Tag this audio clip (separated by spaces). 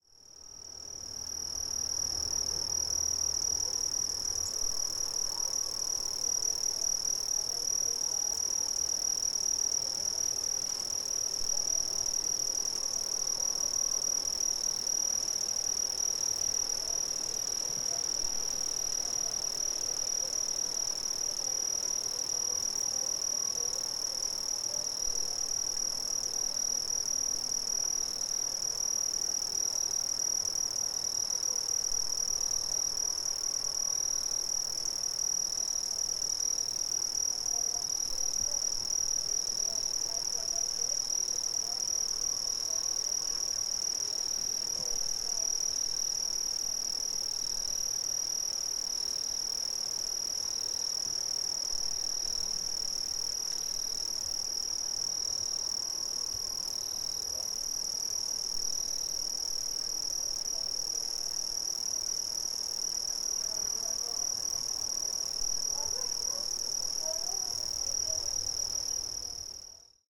afternoon atmosphere BG brazilian cricket film FX light movie sunny Tascam-HD-P2 wind